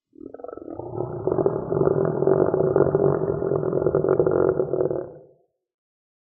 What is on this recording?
annoyed dragon
This is one big dragon that is very annoyed because you just woke her from a thousand year sleep.
annoyed, dinosaur, dragon